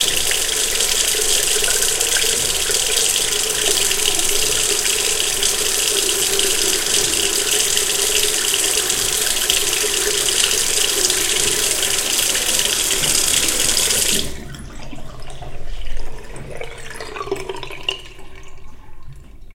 Water that flows into the sink of my bathroom. Equipment that is used: Zoom H5 recorder + Audio-Technica BP4025 Microfoon.